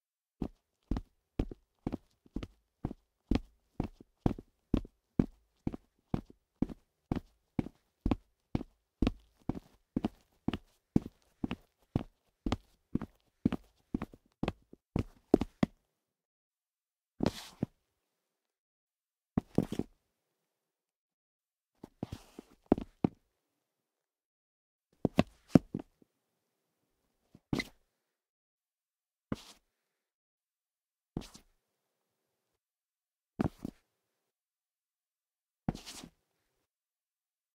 child footsteps (Foley) wearing sneakers and walking on wood.
KM201-> ULN-2.